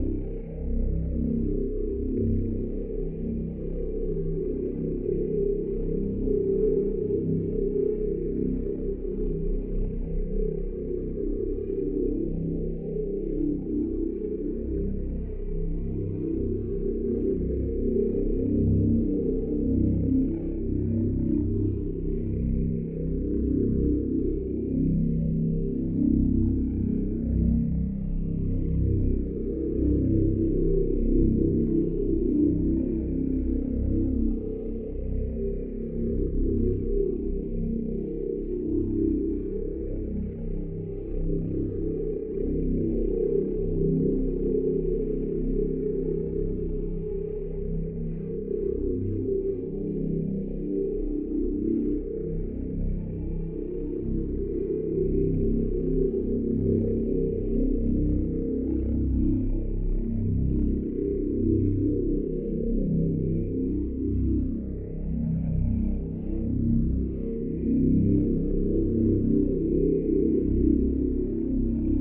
An ambient sound from the Sokobanned project.
zynaddsubfx, tangostudio, ladspa, sound, linux, studio, tango, jack-rack, ambient